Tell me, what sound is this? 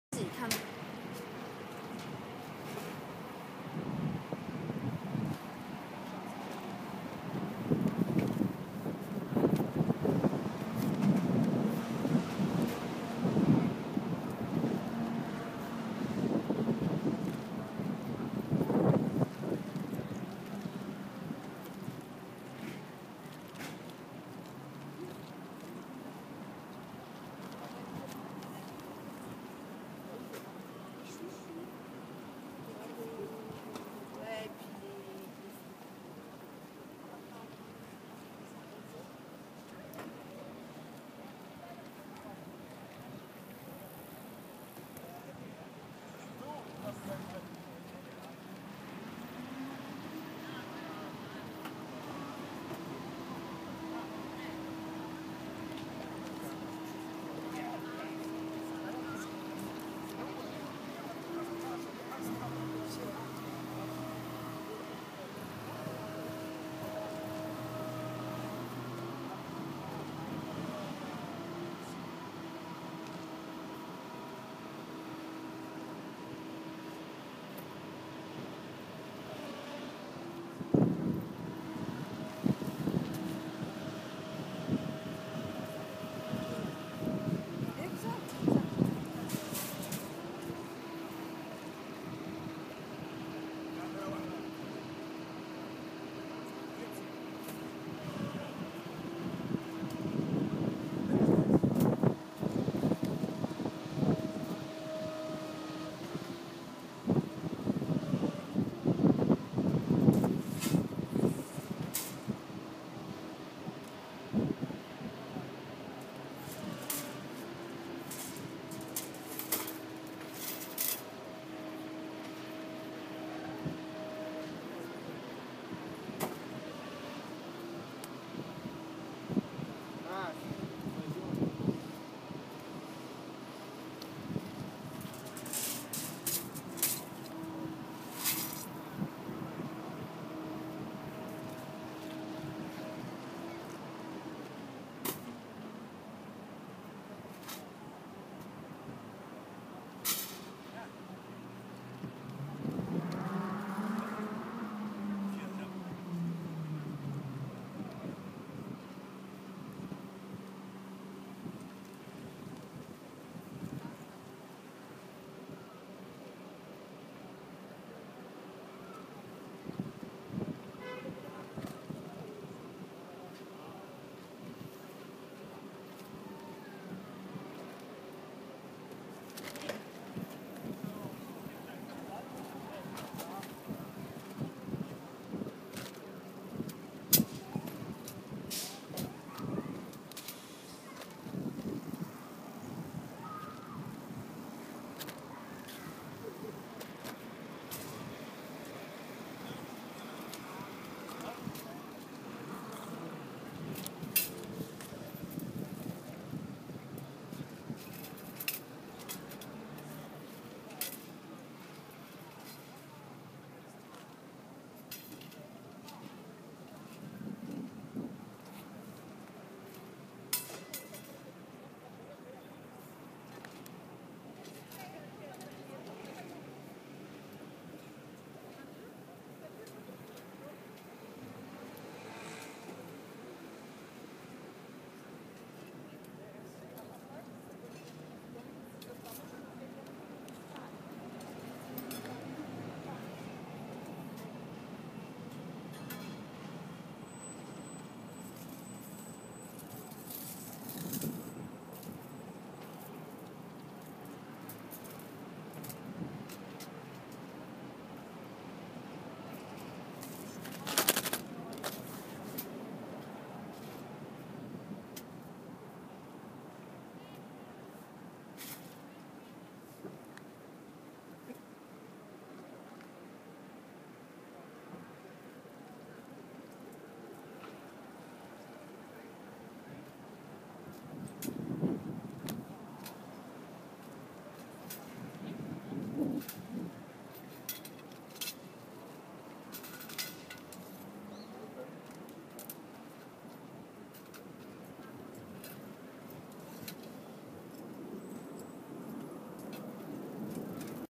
Progetto di rivisitazione di Piazza VIII Agosto a Bologna realizzata dal gruppo di studio dell'Accademia delle Belle Arti corso "progetto di interventi urbani e territoriali" del prof. Gino Gianuizzi con la collaborazione di Ilaria Mancino per l'analisi e elaborazione del paesaggio sonoro.
Questa registrazione è stata fatta giovedi di Maggio durante il mercatino antiquario settimanale di Maggio alle 17:30 da Yukeku